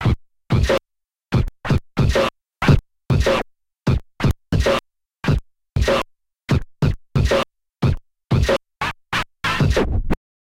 Scratched Beats 007
Scratching Kick n Snare @ 92BPM
Beat, Break, DR-05, Drums, Kick, Record, Sample, Sampled, Scratch, Scratching, Snare, Tascam, Vinyl